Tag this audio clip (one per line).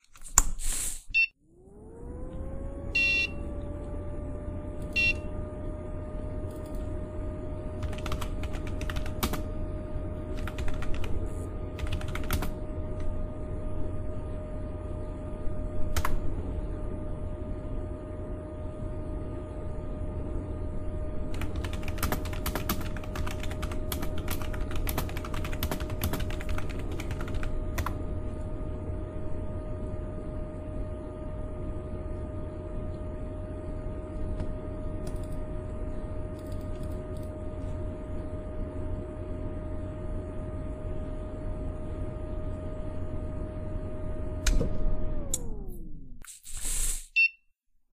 8-bit
computer
microprocessor
start
startup